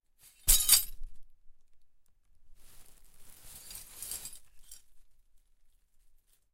Glass in Plastic Bag
A broken 'Coor's Light' pint glass in a plastic bag dropped onto a bed.
Simple.
bag, broken, dop, glass, plastic, smash